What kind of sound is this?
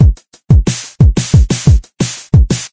Loop for leosodem, first bass drum hit fixed and added a snare hit at the end.